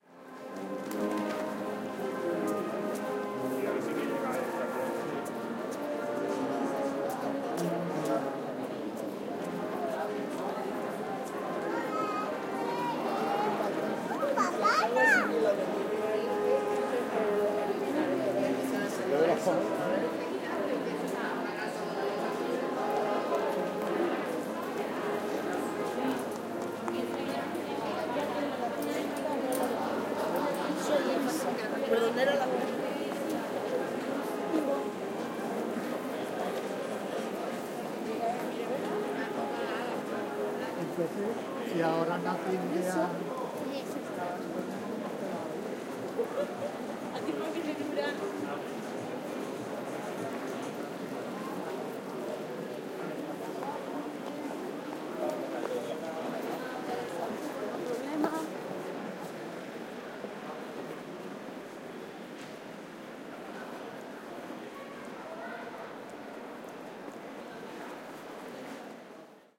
20151207 street.ambiance.02
Lively street ambiance in downtown Seville, people talking in Spanish, some music in background. Soundman OKM mics into Sony PCM M10
ambiance, binaural, city, field-recording, kids, Spain, Spanish, street, talk, voice